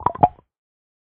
all of the jaw popping samples stacked on top of one another
bones, clap, crack, joints, percussion, pop, snap, snare